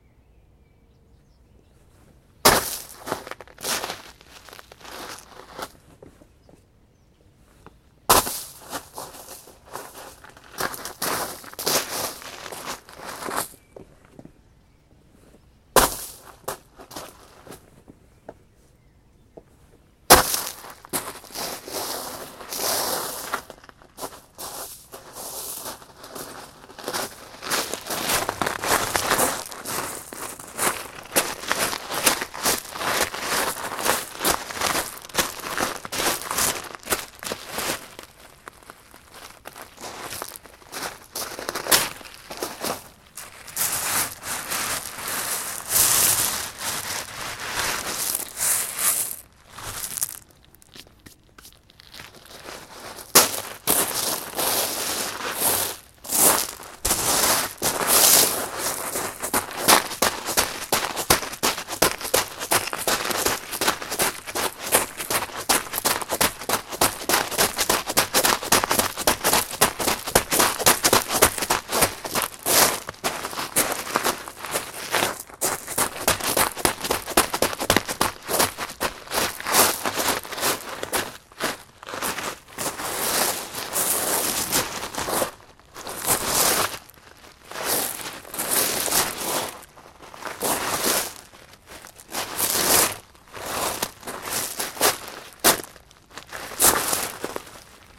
sfx turnschuhe auf kieselsteinen 03
Walking on pebbles with sport shoes
pebbles, walking